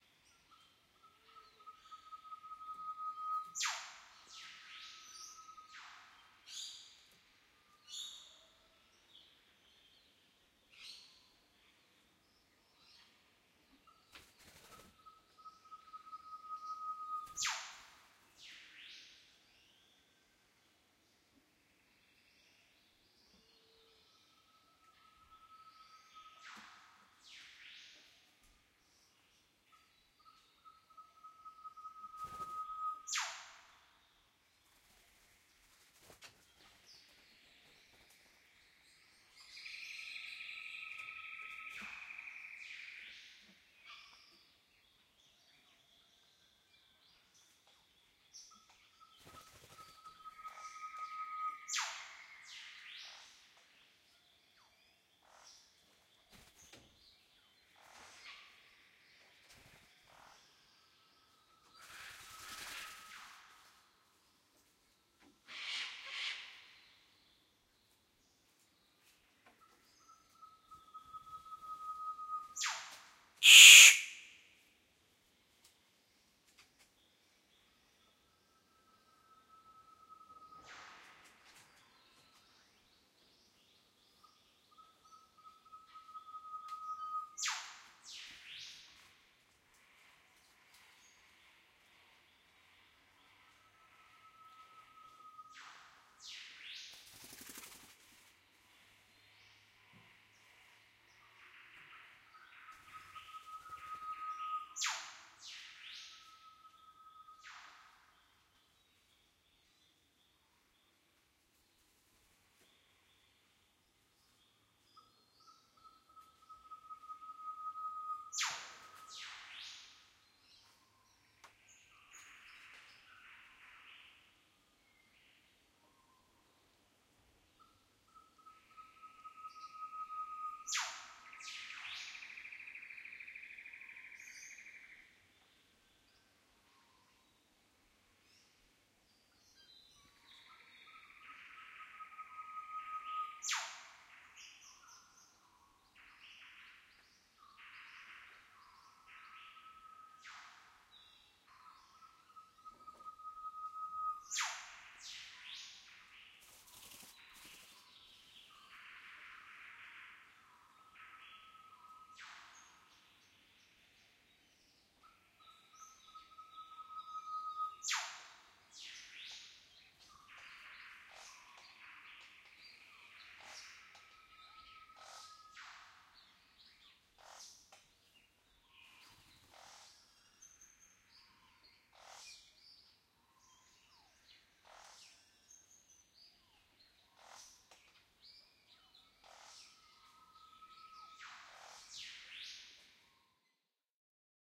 Rainforest Morning Chorus 2
WARNING!!! Watch out for the very loud Catbird in the middle if you have headphones on :) Sounds of the rain-forest in the morning. Birds include - Northern whipbird, Green Catbird (noisy one), Riflebirds, Parrots (and more). Recording chain: Audio Technica AT3032 stereo microphone pair - Sound Devices MixPre - Edirol R09HR digital recorder. Crater Lakes Rainforest Cottages